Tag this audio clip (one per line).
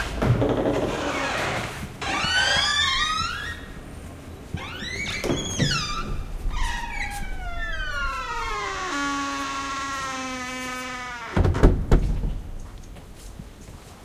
cathedral
church
door
gate